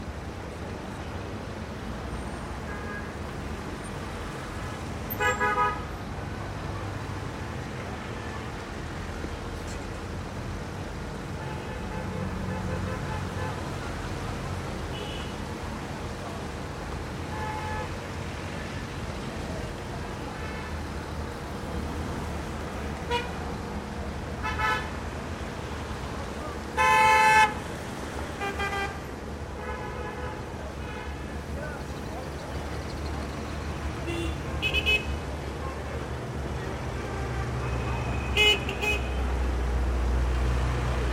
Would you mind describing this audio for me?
auto horn honks doppler in wet traffic Gaza 2016
auto, wet